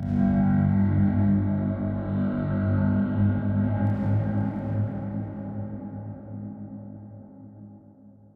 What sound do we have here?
Monster Tripod horn
I have slowed down my voice and made this creepy kind of tripod horn